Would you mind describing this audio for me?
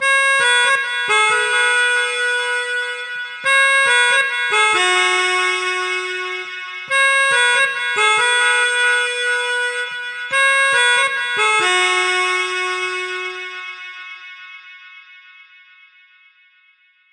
DuB HiM Jungle onedrop rasta Rasta reggae Reggae roots Roots